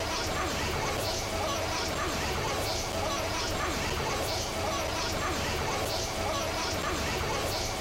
Not really an alarm